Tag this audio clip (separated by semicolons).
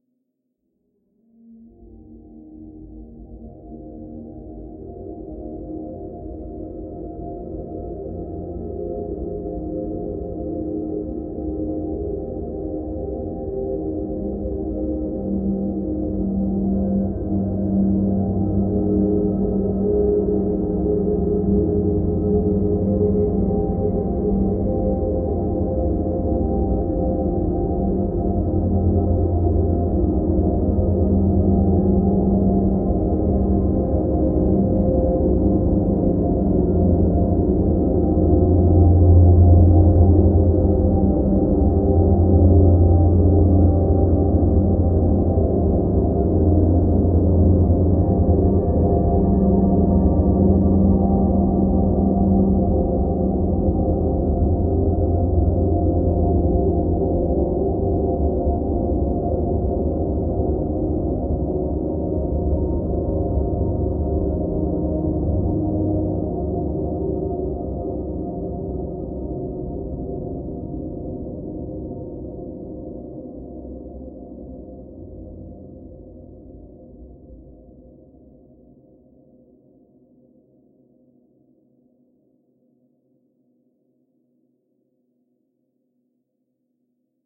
dreamy; multisample; soundscape; artificial; ambient; evolving; drone; pad; smooth